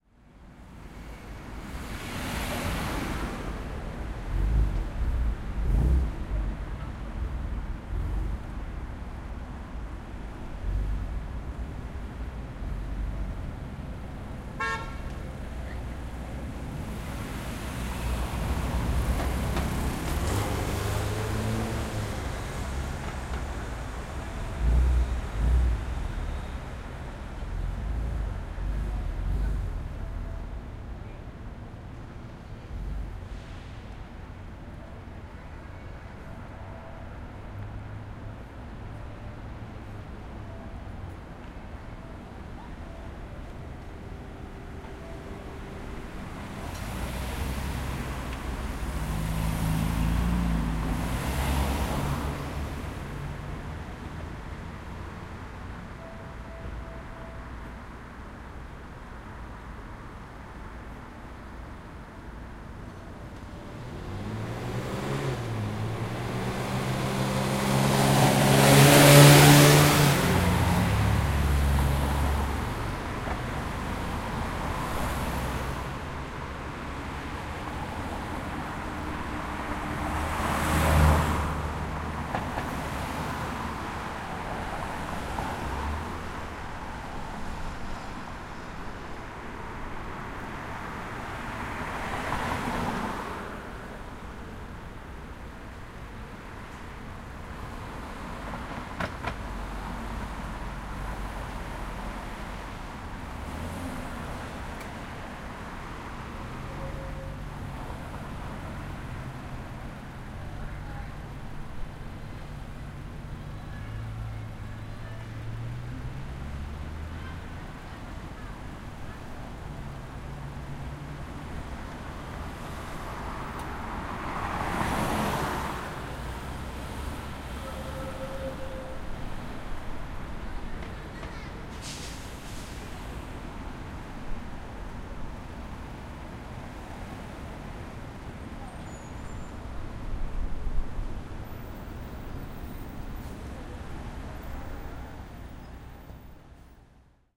0328 Crossroads at night Naebang
Crossroads at night. Traffic and some music and people in the background.
20120624
bus, field-recording, horn, korea, motorbike, seoul, traffic